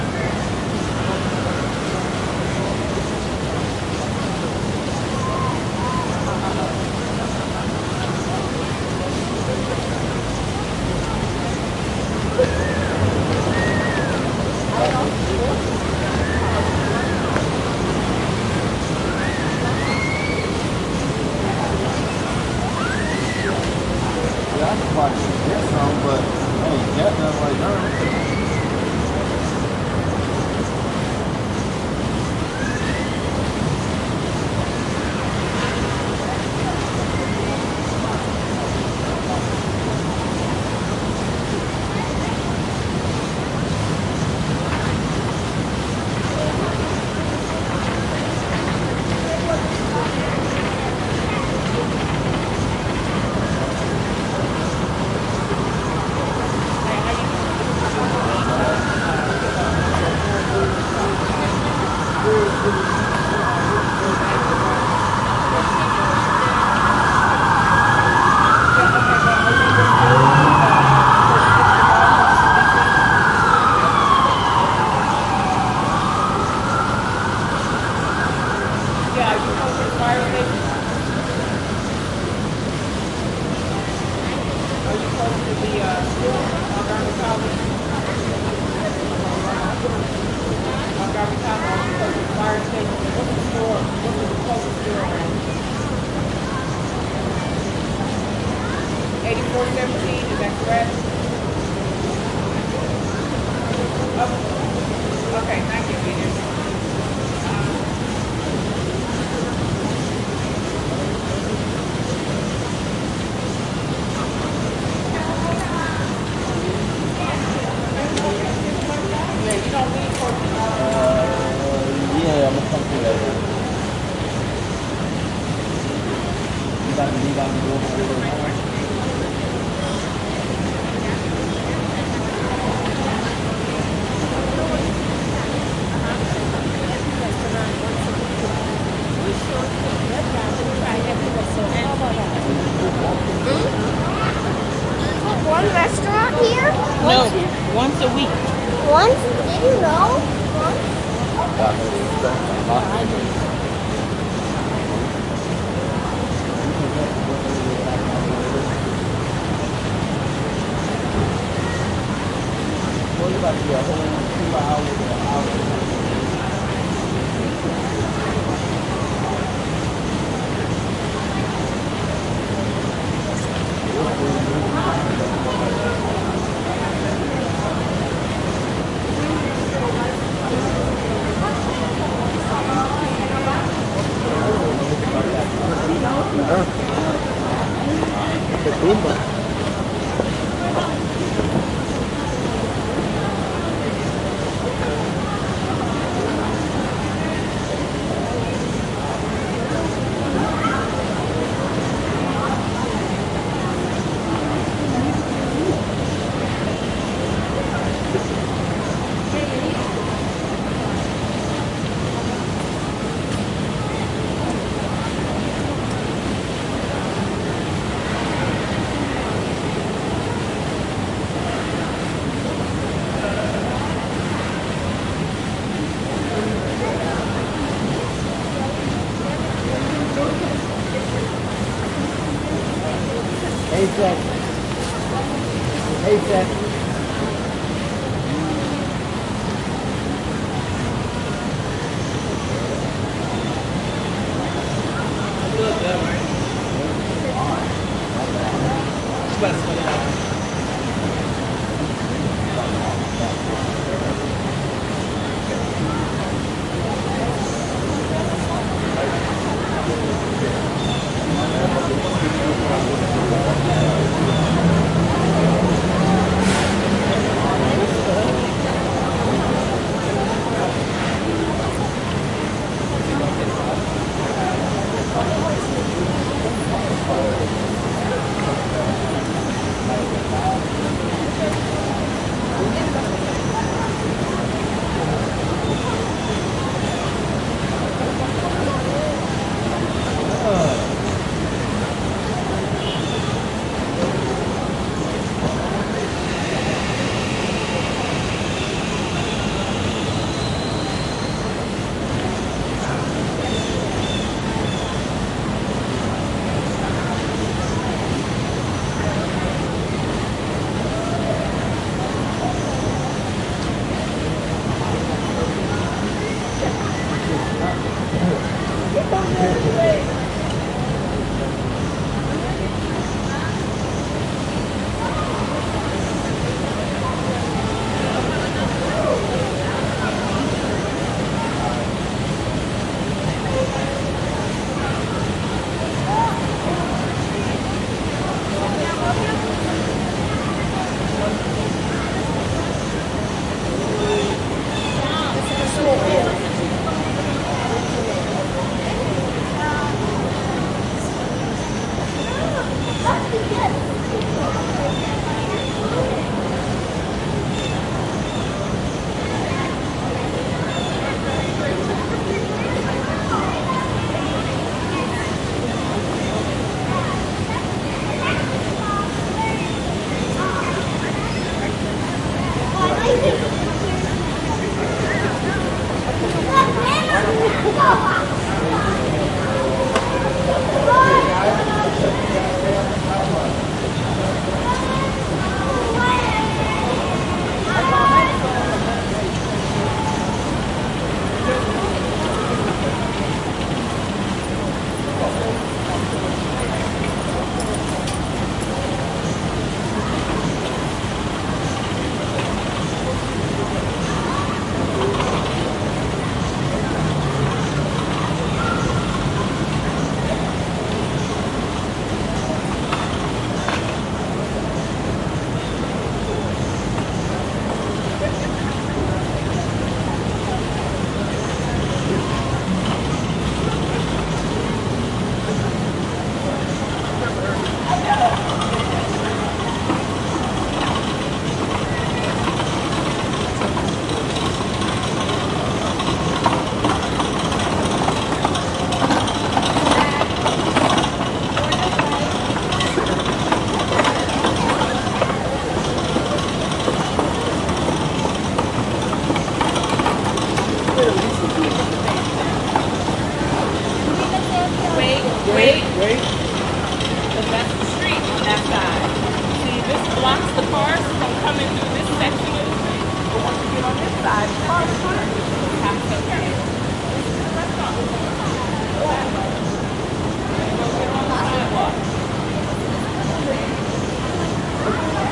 Urban sidewalk with siren.
background-sound, atmosphere, general-noise, field-recording, background, urban, walla, people, atmospheric